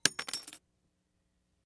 glass break 2
Small shard of glass tossed onto more broken glass
Recorded with AKG condenser microphone M-Audio Delta AP
smash, crash, glass-break, glass